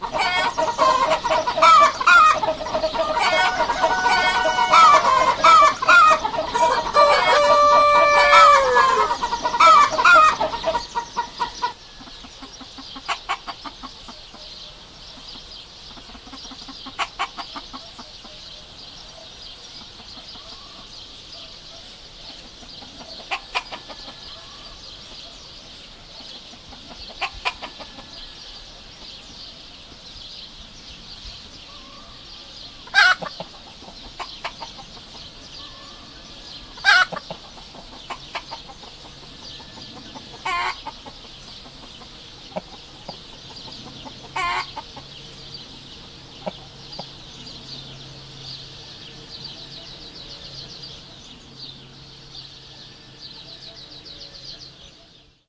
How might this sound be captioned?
a mix of several chickens and a roster
BWWACK, chickens, pultry